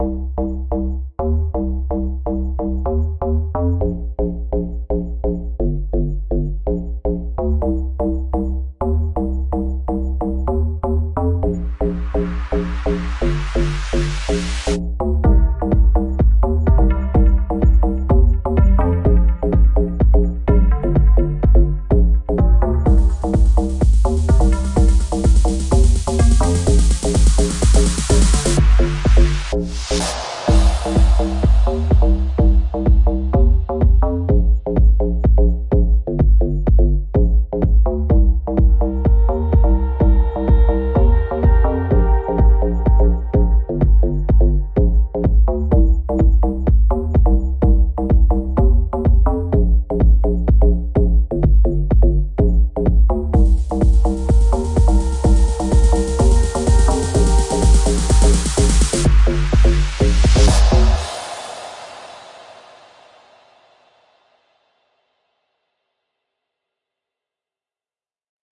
Bass, Kick & Pluck
Bassline, Stab, Noise, House, Ride, Loop, Electric-Dance-Music, Bass, Kick, FX, Pluck